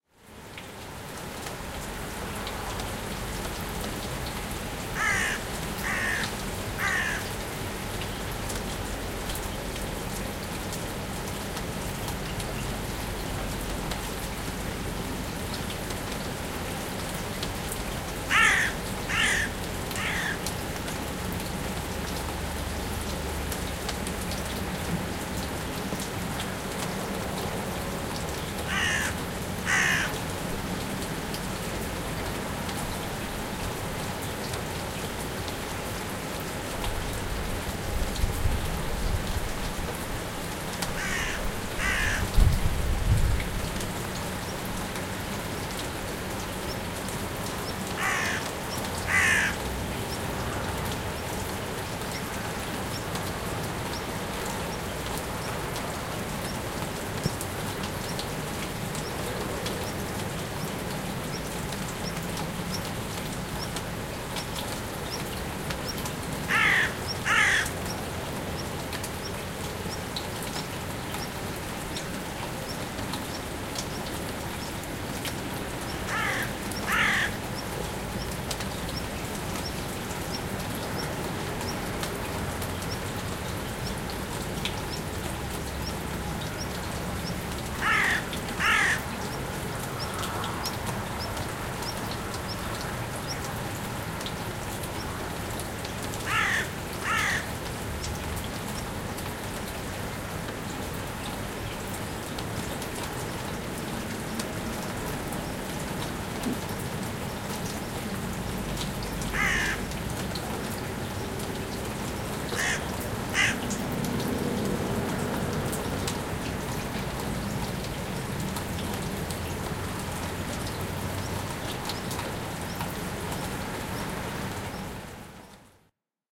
Crow-in-distance
Rainy day, a lonely crow sits on a tree and caws. Several calls at a distance. Almost inaudible traffic. Recorded in a Swiss village, 1000 meters above sea level.
field-recording, Singlecrow, lightrain, bird, nature